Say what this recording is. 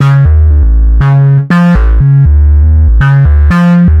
DeepBassloop7 LC 120bpm
Electronic Bass loop